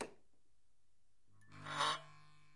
Old lamp - Electricity
bulb, electricity, field-recording, fluorescent, lamp, light, lights, old, switched, switched-on